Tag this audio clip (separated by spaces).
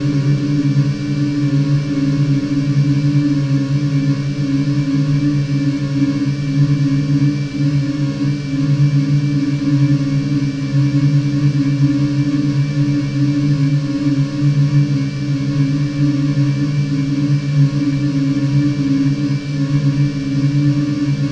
evil grains granular synth